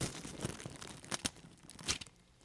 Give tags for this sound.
crumple paper plastic cloth

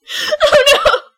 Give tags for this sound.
english female girl laugh speak talk voice woman

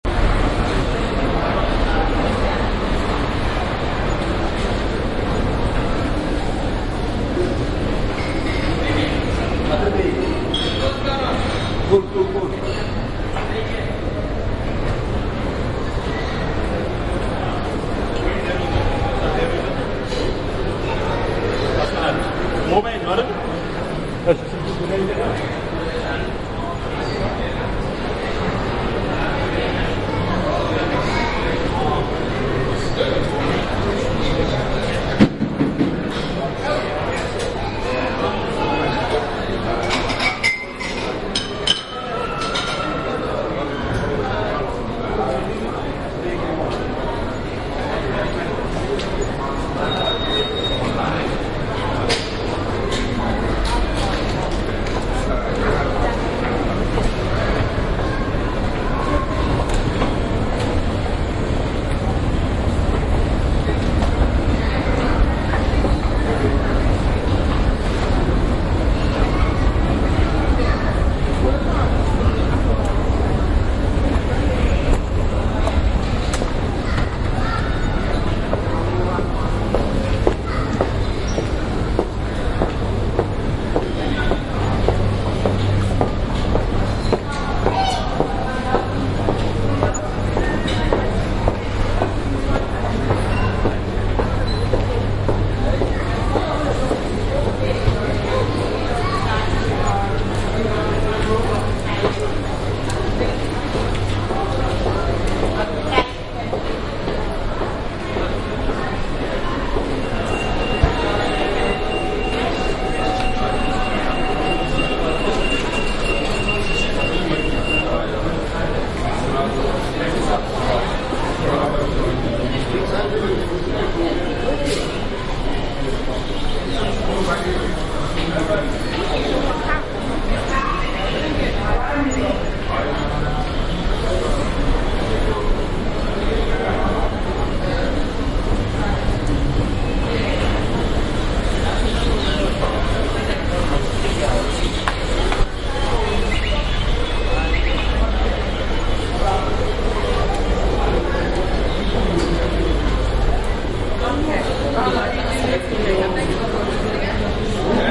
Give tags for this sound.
field-recording ambience background-sound london ambiance soundscape general-noise city ambient atmosphere

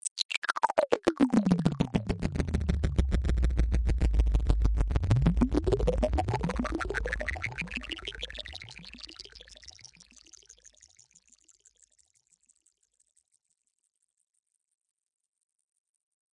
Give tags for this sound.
acid electronic fx sfx sweep synth

